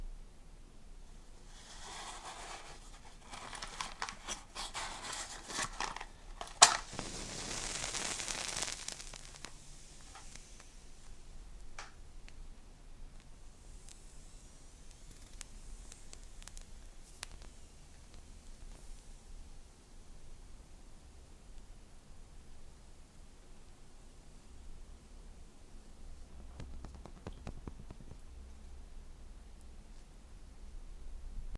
fire match
sound of a scratched on box match burning with fire
burning, fire, light, match, matches